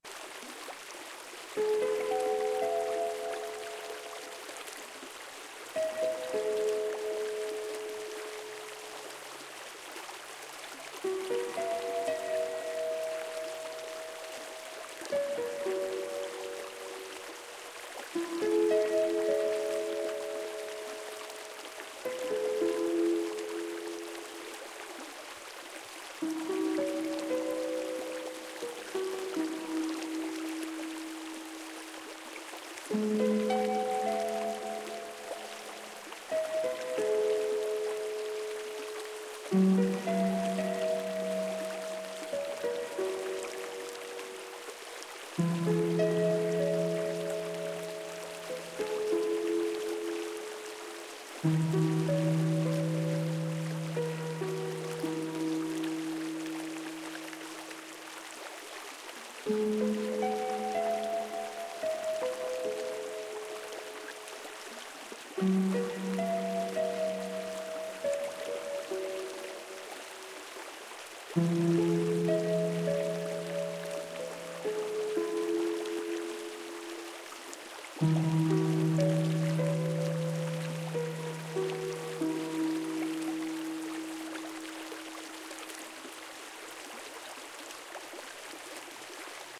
relaxation music #10
Relaxation Music for multiple purposes created by using a synthesizer and recorded with Magix studio.
I used sounds that aren't mine:
Like it?